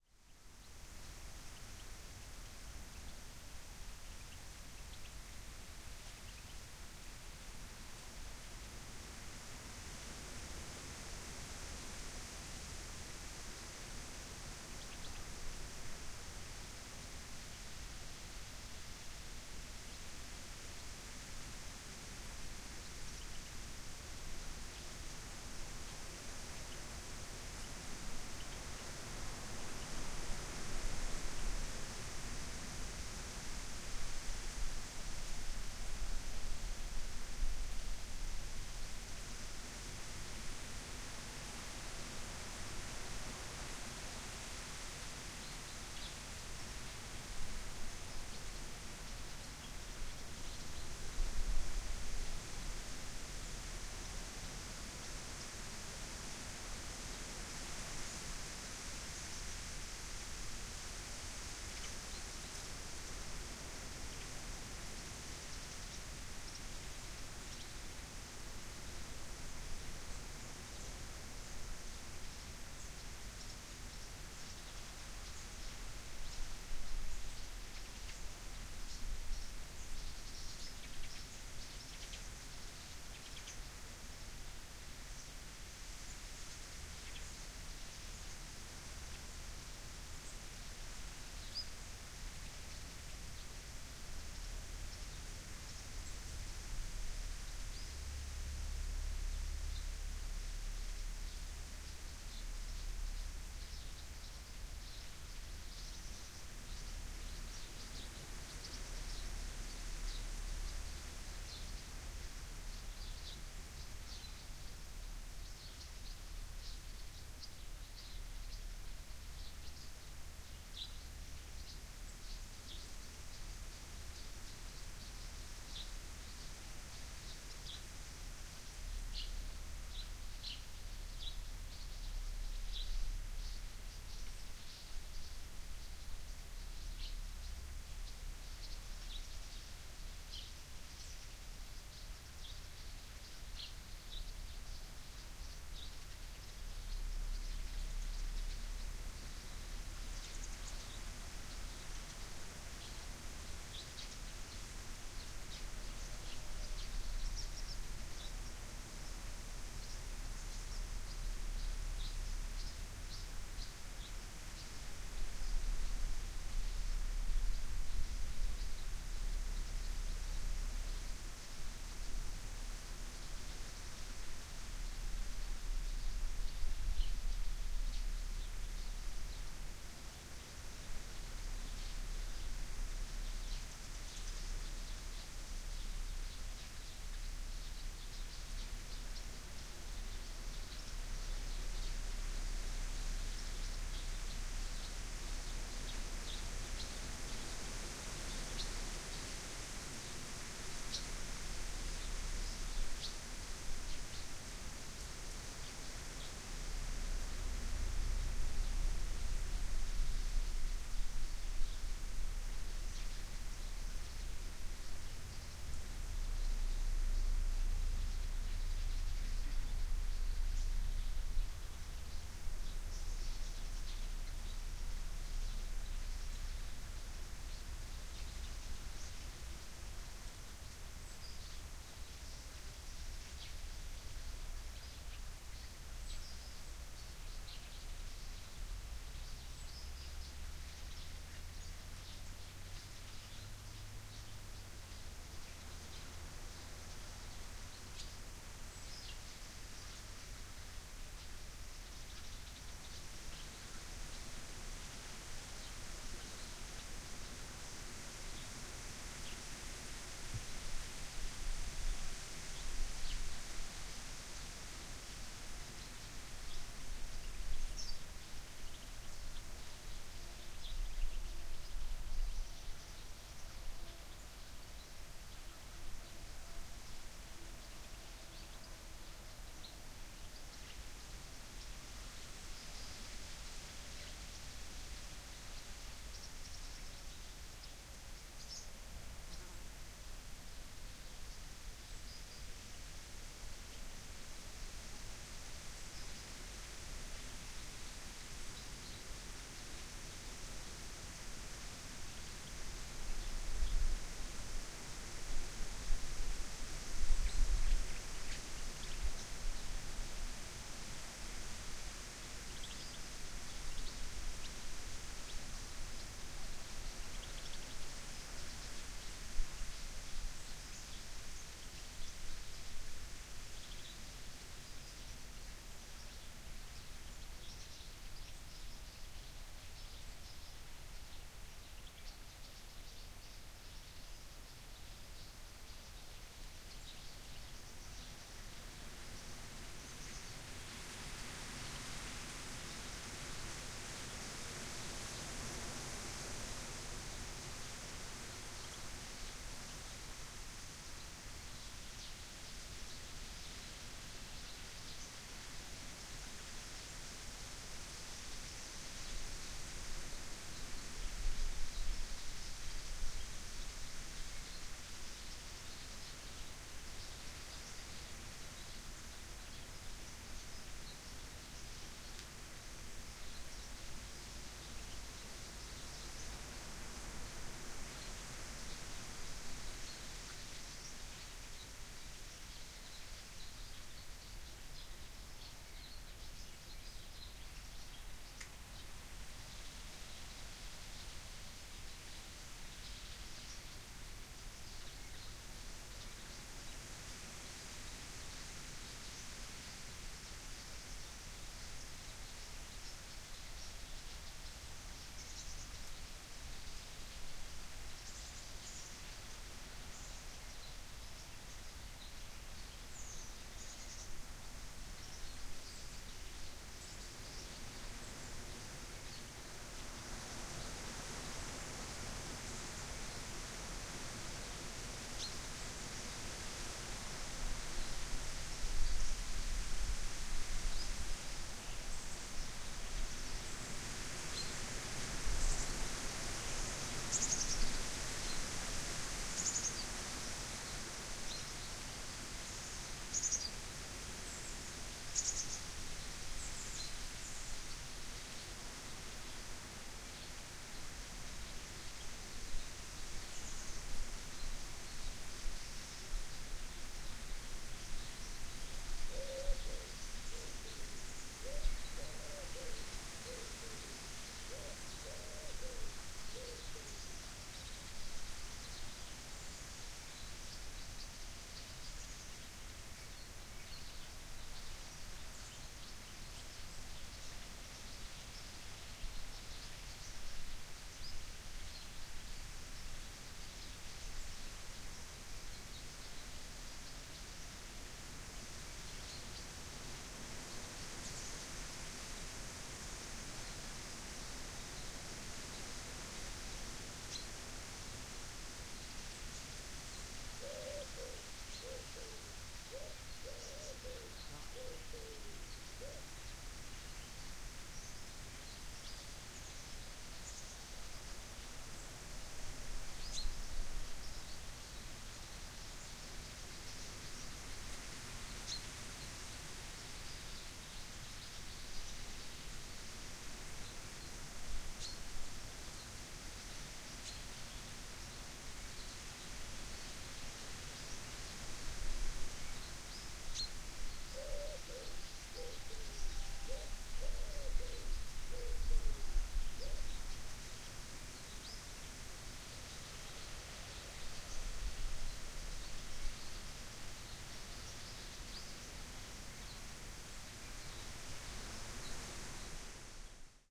Birds and cocoo 02
Recording of birds in the morning at about 7.00 am, in my garden. It was a little windy a some points, but that's the wonderful nature for you. Every once in a while you can hear some cocooing. Forgive me, but i don't really know enough about birds to tag them.
This was recorded with a TSM PR1 portable digital recorder, with external stereo microphones.
fieldrecording, cocoo, wind, garden, windy, birds